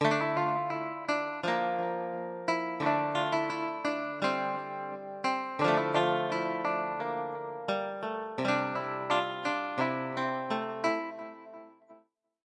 Get Trippie - 130BPM Em
Lacked a description. This melody is so beautiful, might leave you in tears.
roddy-ricch, trippie-redd, melody, roddy, trippie, trap, trap-beat